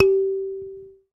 SanzAnais 67 G3 forte
a sanza (or kalimba) multisampled
african, kalimba, percussion, sanza